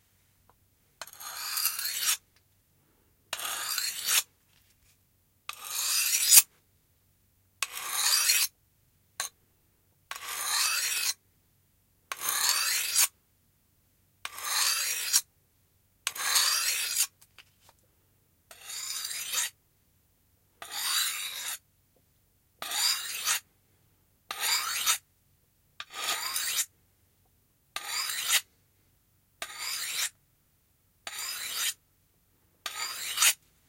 20080103.knife.sharpening
noise of a knife blade being sharpened
sharpening, sword, threatening, blade, knife